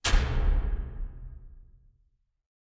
Light switch turn on
I made this sound from a couple of other effects adding distortion and two different levels of echo and reverberation, then mixing the tracks